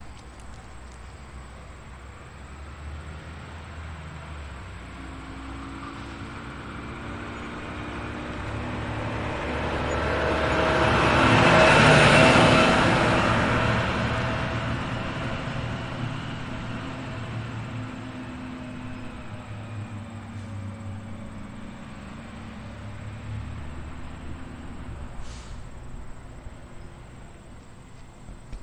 Garbage Truck Passing By
Just a garbage truck driving by on a summer day.
Tascam DR-40
engine, garbage, loud, noise, sanitation, truck